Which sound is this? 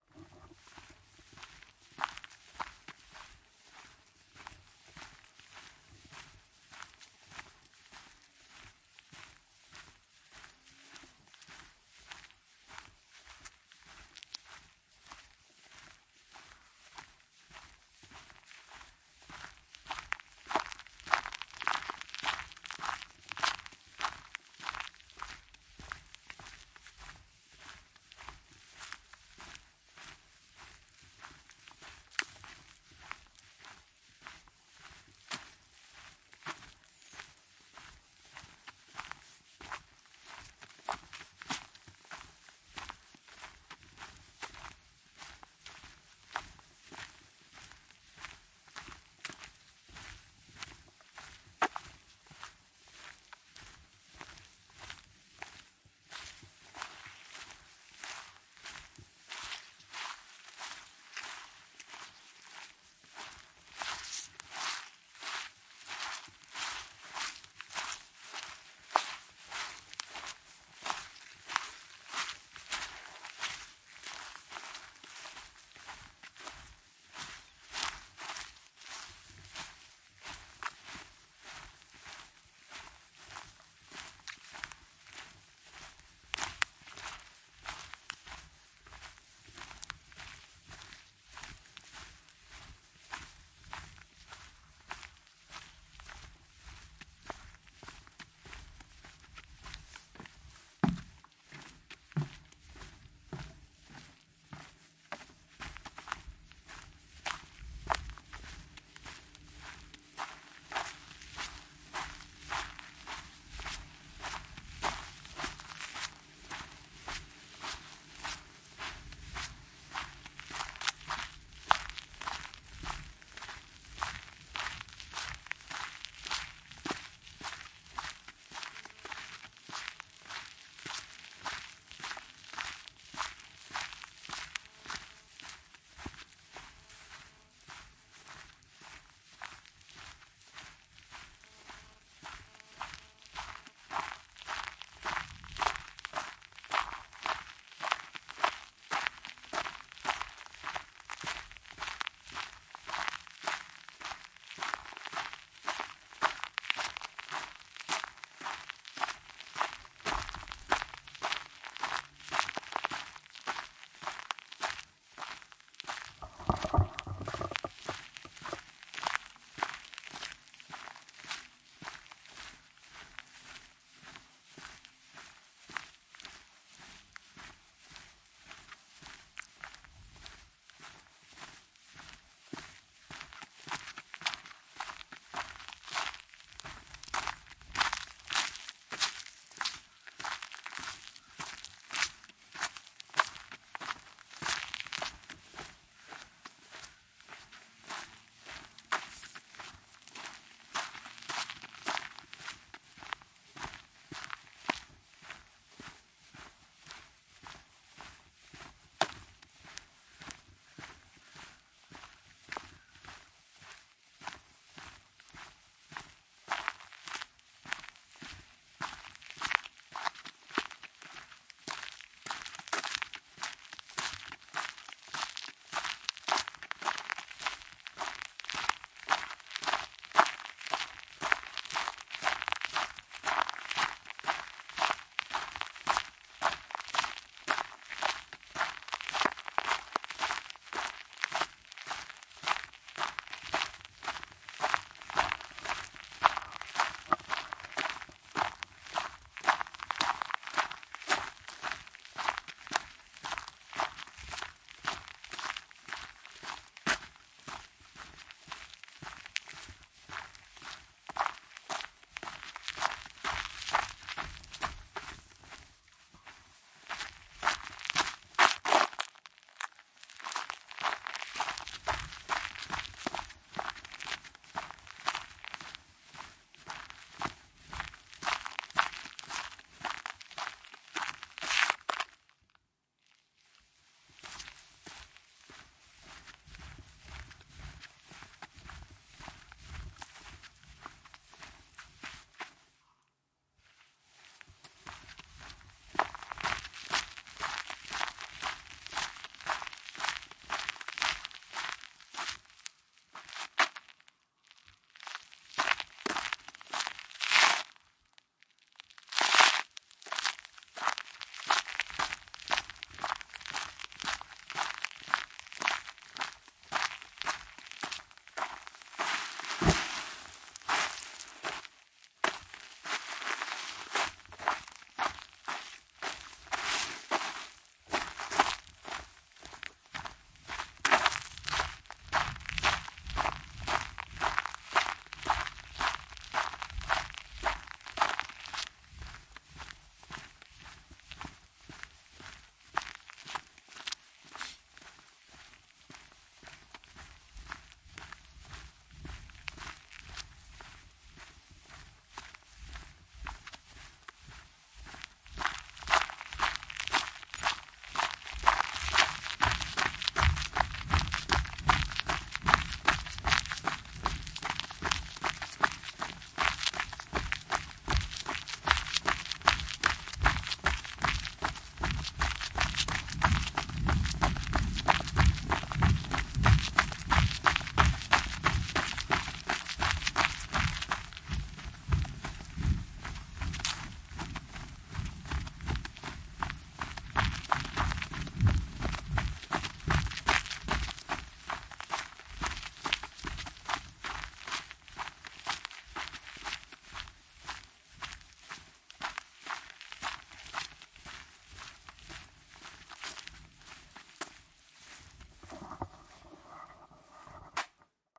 Walk on gravel, grass, wet grass and more. Short runs and start/stops as well.